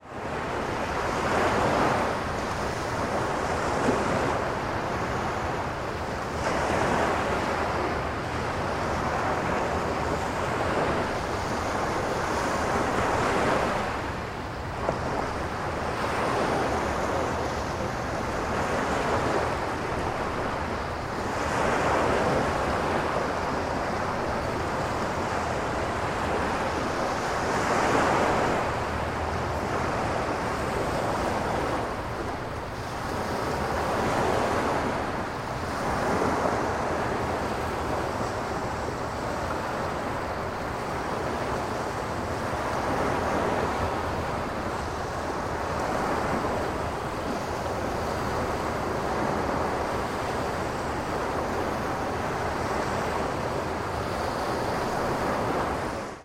sh puget sound blustery november day
A blustery day on Puget Sound in November. Wind and small waves breaking on the shore. Sennheiser MKH-416 microphone in a Rycote zeppelin, Sound Devices 442 mixer, Edirol R4-Pro recorder.
puget, seattle, sound, washington, water, waves, wind